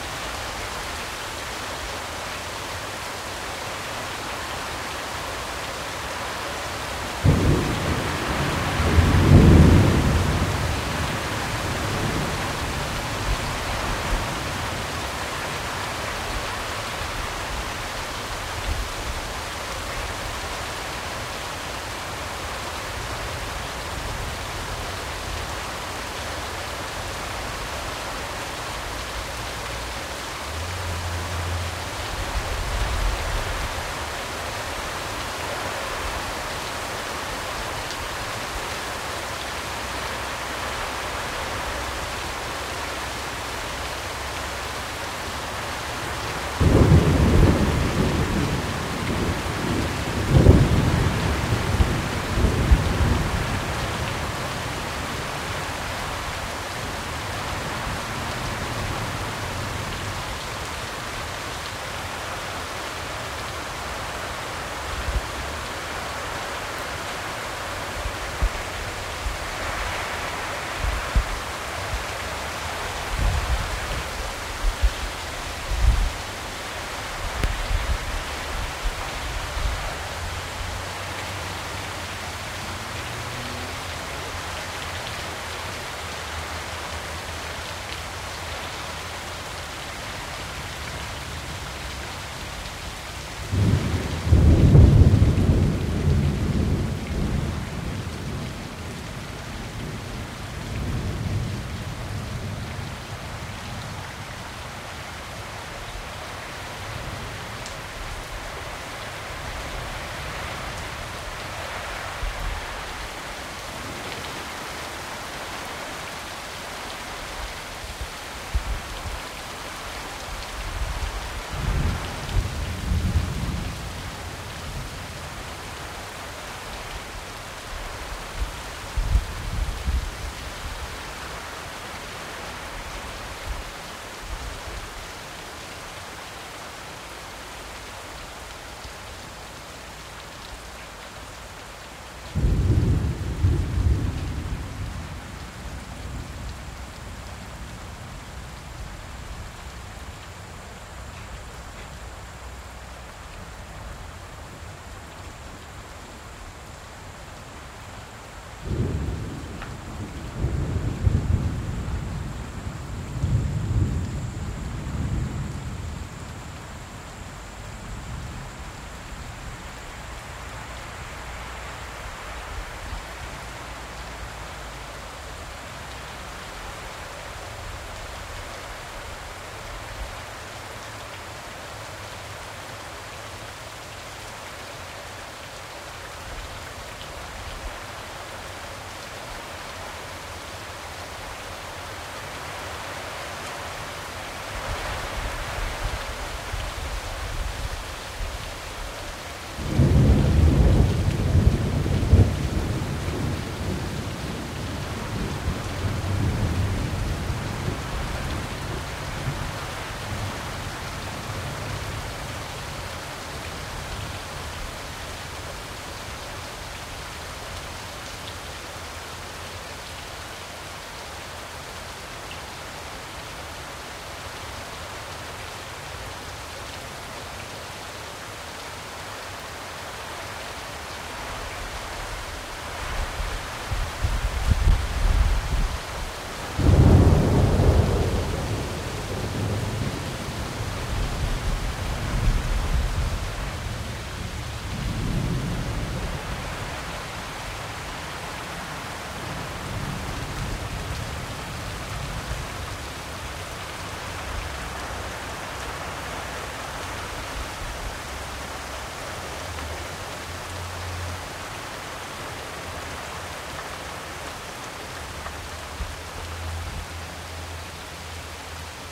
LONG THUNDER ROLLS AND HEAVY RAIN
A heavy thunderstorm captured in Brisbane, Queensland, Australia. Several thunderclaps happen throughout the recording. The rain varies in intensity.
EQ'd in Logic Pro X.
created by needle media/A. Fitzwater 2017